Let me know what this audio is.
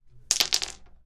Just some rocks falling